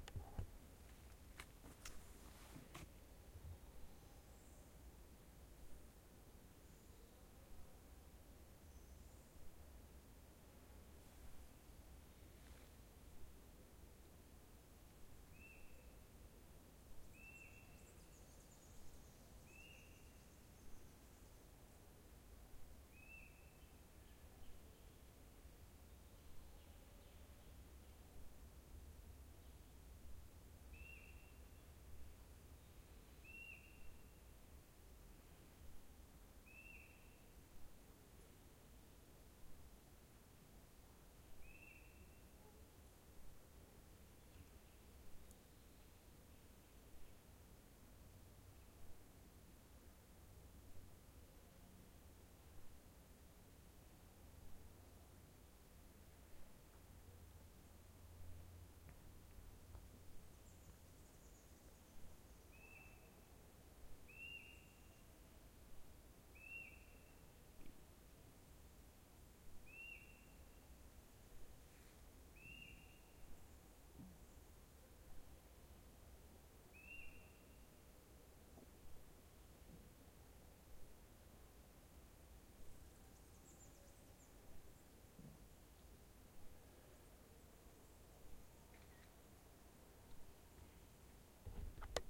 Quebrada La Vieja - Canto de aves entre el bosque
Grabación en la Quebrada La Vieja Bogotá -Colombia
Canto de aves en un bosque de eucaliptos a las 08:39 a.m.
Field recording from river La Vieja Bogotá - Colombia
Birdsong inside an eucalyptus forest at 08:39 a.m
bogota, field-recording, bird, ave, bosque, colombia, birdsong, eucalyptus, paisaje-sonoro, canto, forest, eucalipto